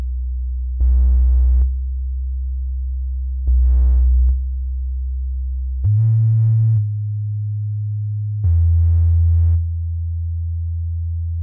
Still using the VST Tracker by MDA of Smartelectronix to provide a sub-oscillator to add to the monotron sound.
This clip is actually the sub-oscillator on its own (no monotron sound)
It should be a perfect sine, but since the pitche tracking is not perfect, and I was playing with the parameters on the monotron, there is likely to be some distortion and it will not be a pure sine.